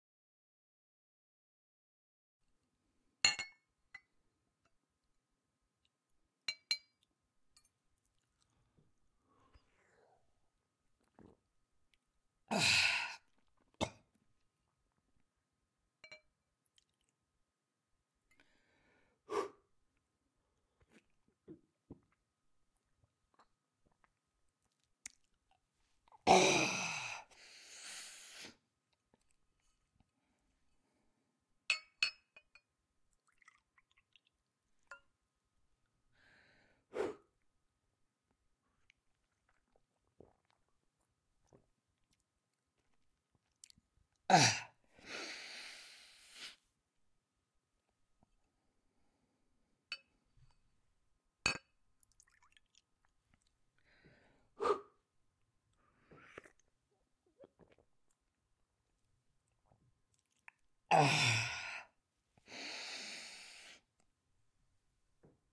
man is pouring and drinking 3 vodka shots